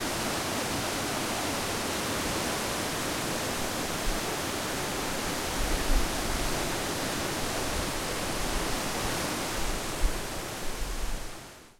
Large river rapids running strongly after winter rain. Recorded approximately fifty feet above water level. The river flows and turns through a narrow, high, partly exposed bedrock canyon which likely amplifies the sound of the rapids. The first nine seconds are unedited. The last two seconds have a fade out applied.
In summer you can walk where the centre channel is in winter, so this is a lot of water moving swiftly down the Cowichan River to the Salish Sea.